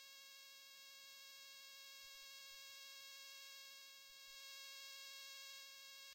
Electrical Noise High Tone
Electrical noise with high tones using telephone pick-up.
Recorded with Zoom H4n un-processed no low or high cut.
44,100 Stereo.
Techy, noise, design, glitchy, electrical, Oscillation